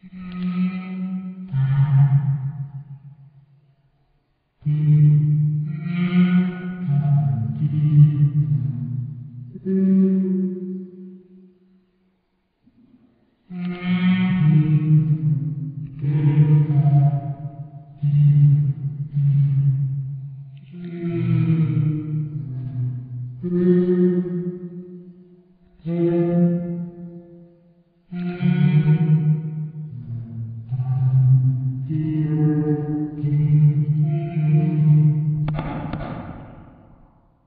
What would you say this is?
scary sound
Sinister monster sound with large room reverb. Created by making silly noises and slowing them down.
monster, boop, room, creepy, scary, spooky, ghost, sinister, tunnel, horror, haunted